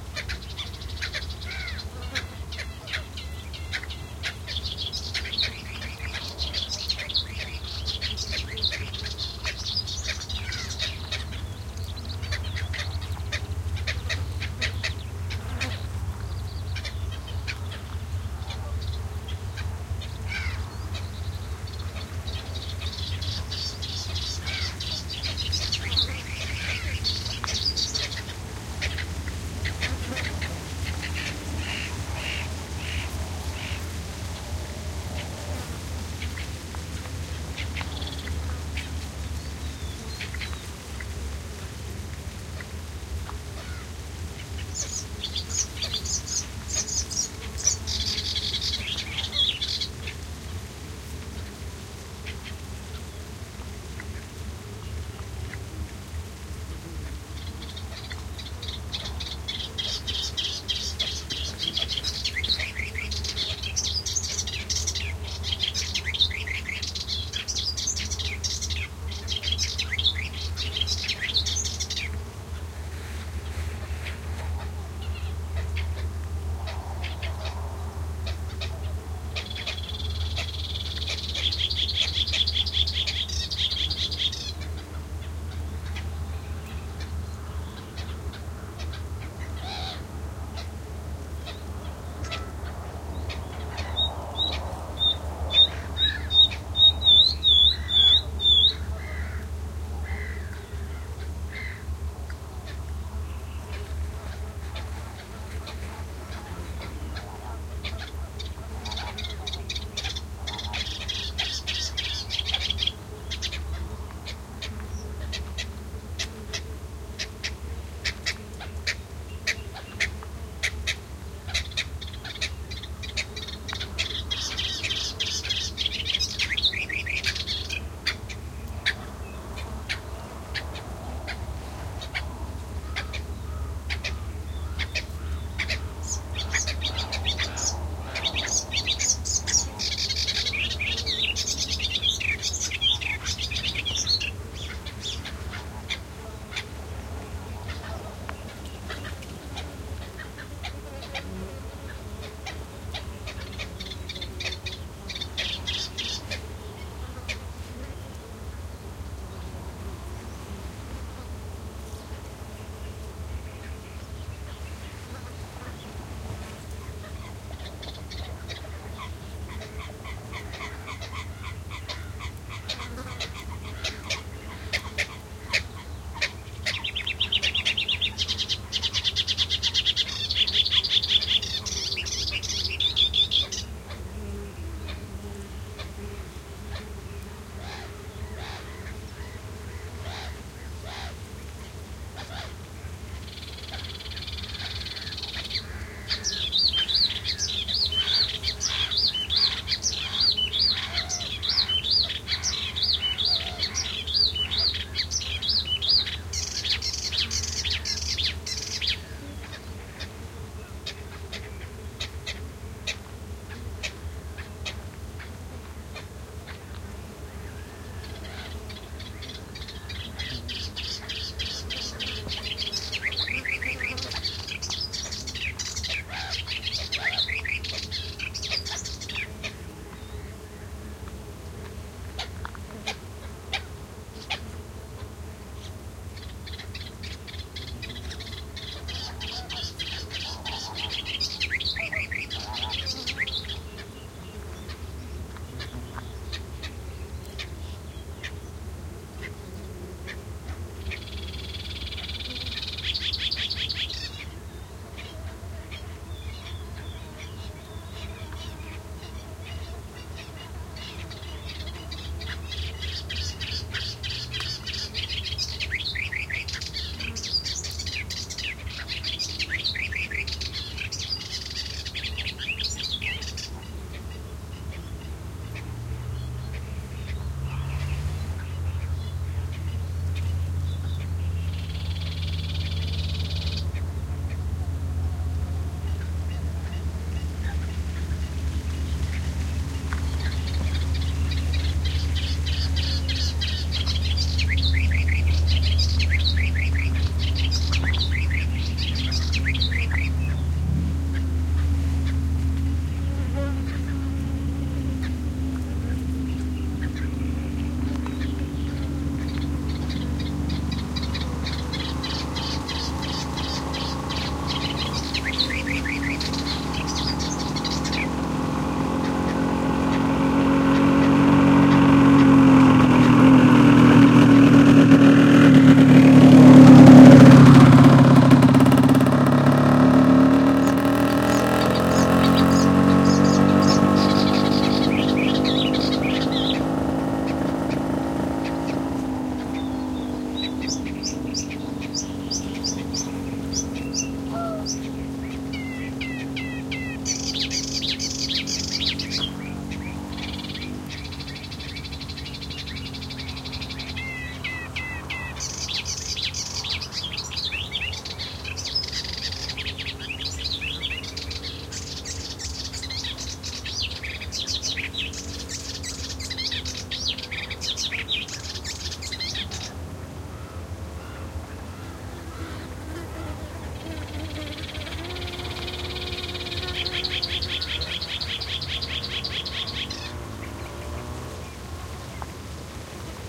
Bird callings (Melodious Warbler, Mediterranean Gull, Black-winged Stilt, Common Coot), insect buzzings and, at 5 minutes, a passing bike. Recorded near Laguna Ballestera (La Lantejuela, Sevilla Province, S Spain) using Primo EM172 capsules inside widscreens, FEL Microphone Amplifier BMA2, PCM-M10 recorder